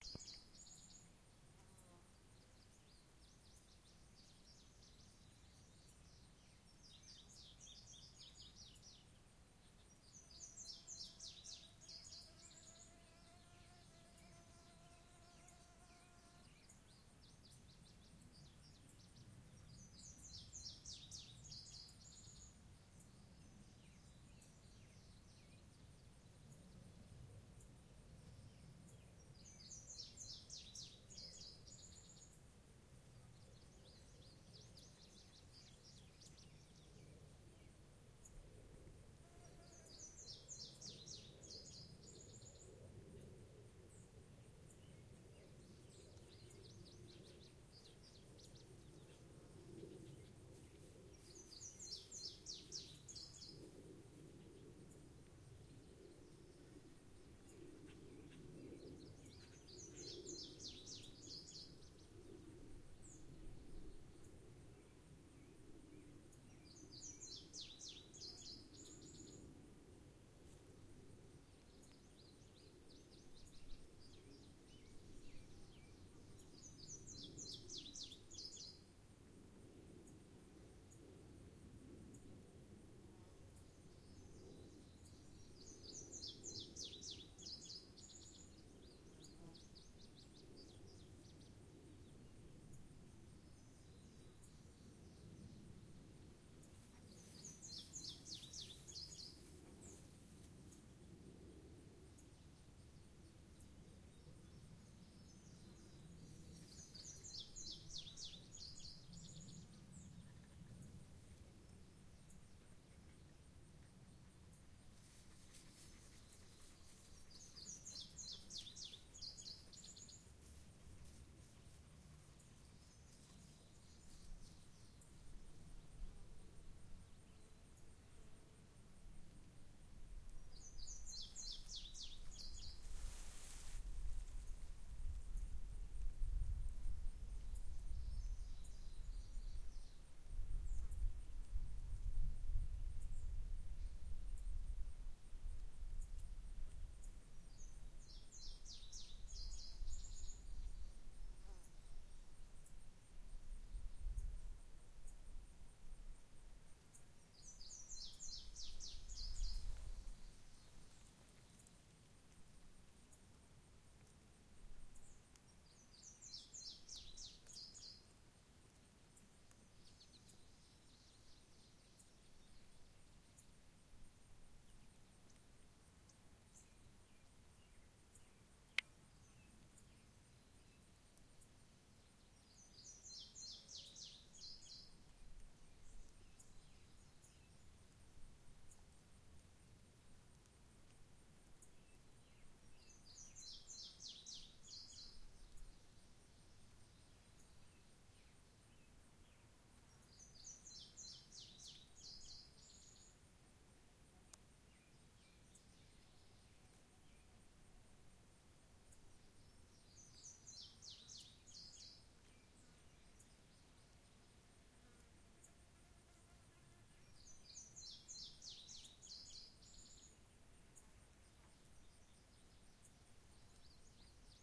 Birds near a west virginia cornfield
A field in West Virginia, early afternoon. A dog passes by at one point. Later in the recording a wind picks up, causing some mic noise. Be wary for a couple of mic pops at about 2:56 and 3:23 - caused, I believe, by something hitting the mic. I'll try to remember to upload a version with those edited out later.
Recording date: July 7, 2011, 1:16 PM.
ambiance, birds, field-recording, nature, summer, unedited, west-virginia